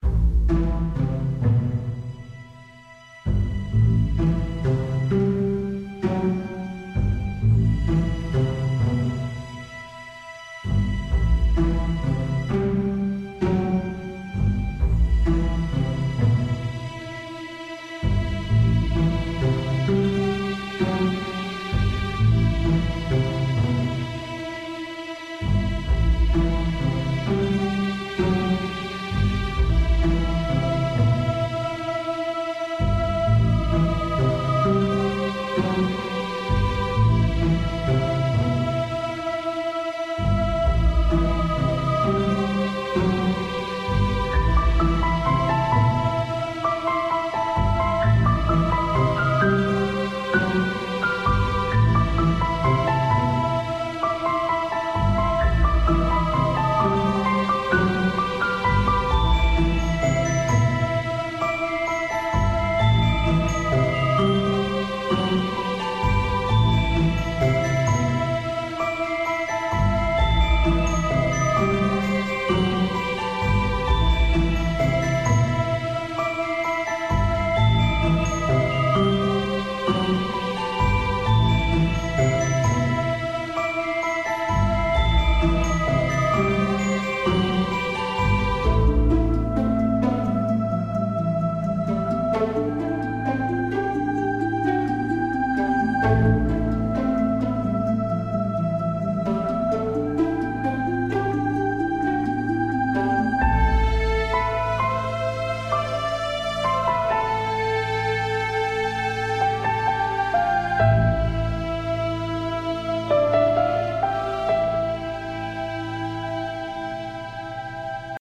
Mysterious Background Music Orchestra
Title: Mist Forest
Genre: Orchestra, Mysterious
Inspired by the Genshin Impact, this composition is best for a place that has an uneasy feeling or has a mysterious creature lurking by. This gives the listener or viewer insecurity.
atmosphere, background, music, mysterious, orchestra